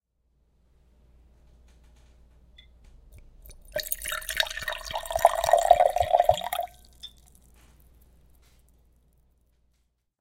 Liquid Pouring No Ice
Recording of a liquid being poured into a glass with no ice. Recorded on my Tascam TM-PC1's.